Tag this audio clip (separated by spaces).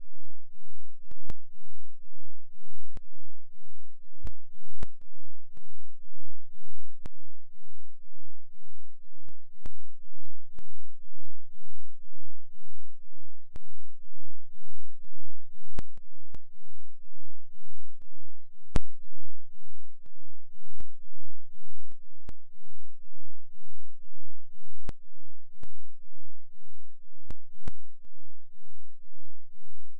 inaudible low-frequency